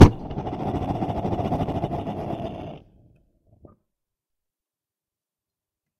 Gas furnace - Ignition long
Gas furnace is ignited and starts to burn, long.